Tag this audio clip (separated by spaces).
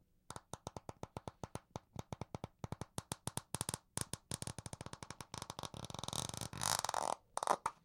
bottlecap buzz plastic tense tension